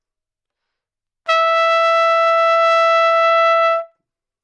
Part of the Good-sounds dataset of monophonic instrumental sounds.
instrument::trumpet
note::E
octave::5
midi note::64
good-sounds-id::2846
multisample, good-sounds, single-note, trumpet, neumann-U87, E5